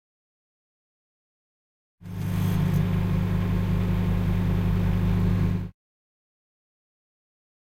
Alien ship opening the door
CZ, Panska, Czech